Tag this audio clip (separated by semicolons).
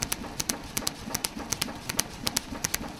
metalwork; power-hammer; machine; quantized; exhaust-vent; work; billeter-klunz; pressure; tools; air; blacksmith; 1bar; labor; motor; crafts; forging; 80bpm